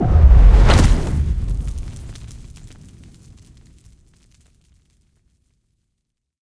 Fire Spell 01
Using some impact sounds made from kicks and crunching produce along with some fire sounds, I have made a fire spell! Have fun, and don't get burned.
Fantasy, Spells, Magic, Spell, Mage, Witch